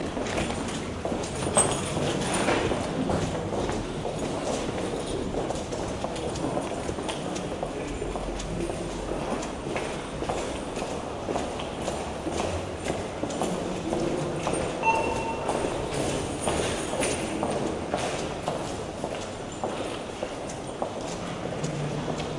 a few men are wakging inside auto parking building.